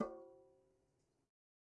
Metal Timbale left open 012
conga, drum, garage, god, home, kit, real, record, timbale, trash